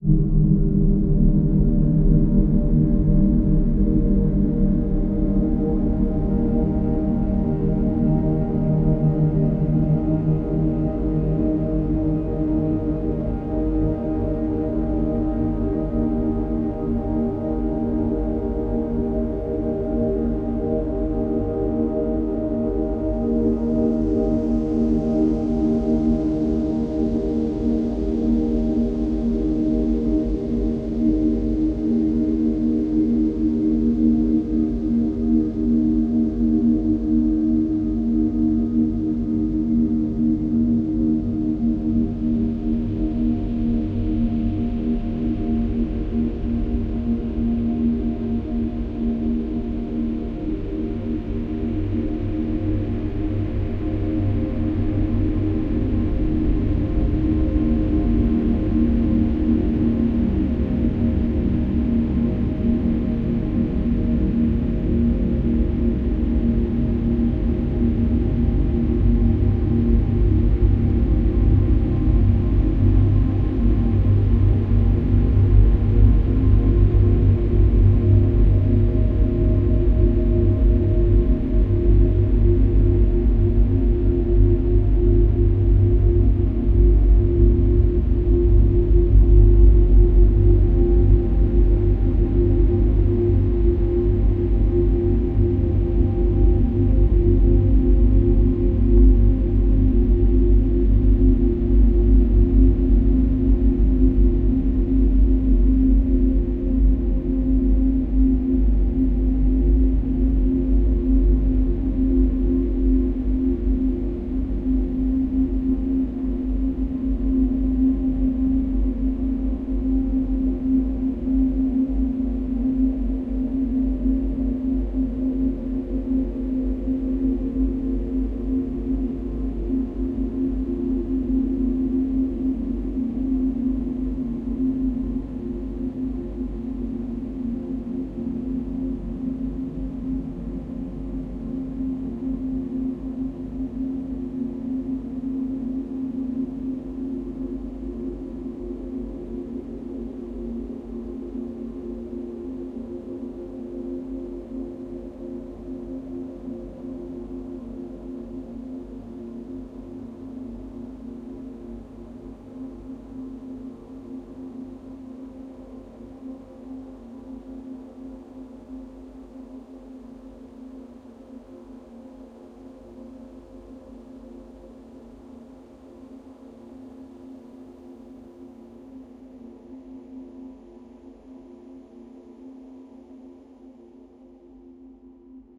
Ambient Wave Single - (8)
As the title applies, a single long ambient sound stretched to oblivion.